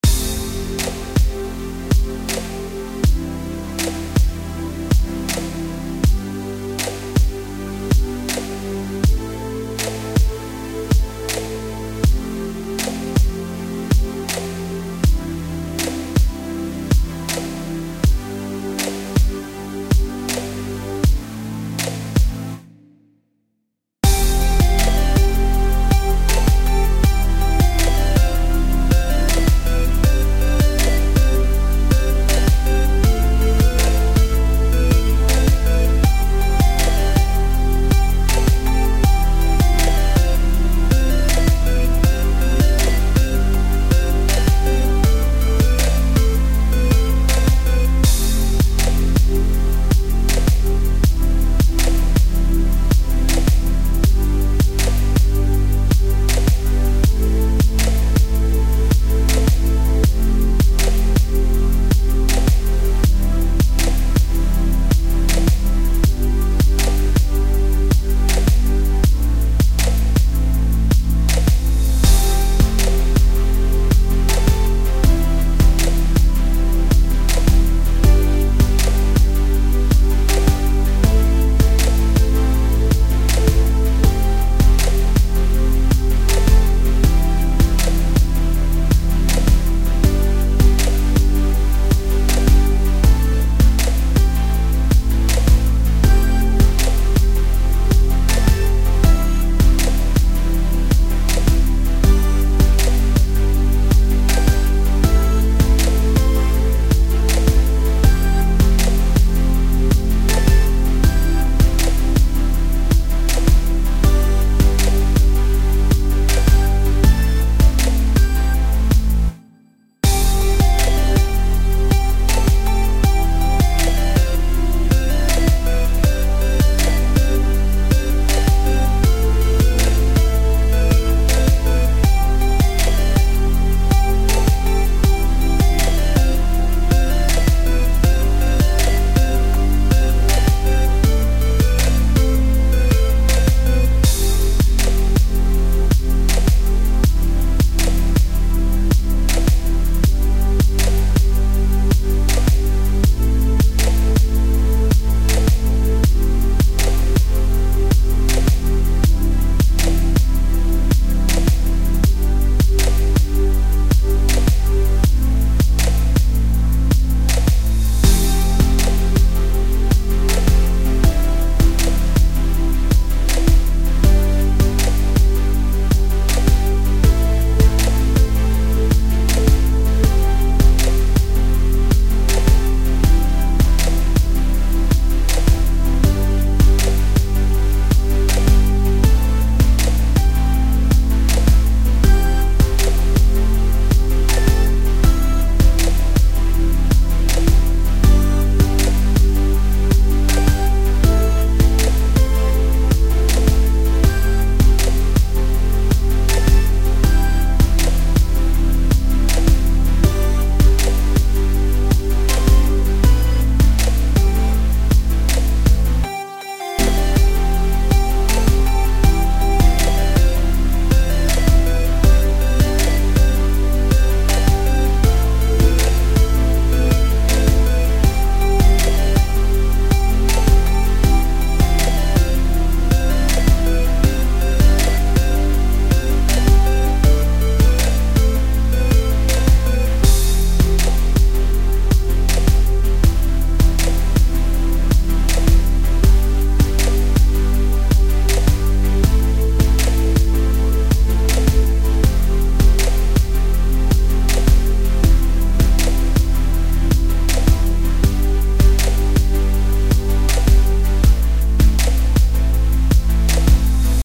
Beat Background Music Loop
Genre: Game, Beat
This one is my classic composition, combination of game adventure and beat genre.
background, loop, game